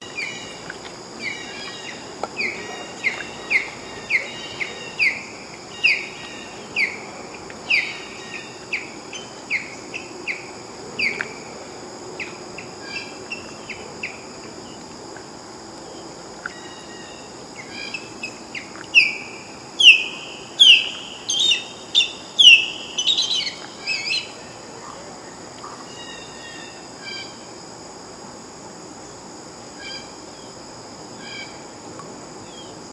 osprey chirps squawks cries
Osprey in the city, chirps, squawks cries. Crickets and city BG with airplane and traffic in far distance.